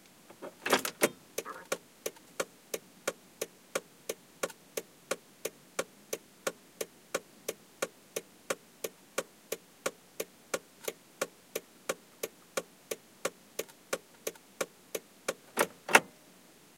20150712 car.hazard.warning.flashers
the clicks you hear after pressing the hazard button. Shure WL183 into Fel preamp, PCM M10 recorder
automobile, car, clicks, door, driving, field-recording, flashers, hazard, truck, underground, vehicle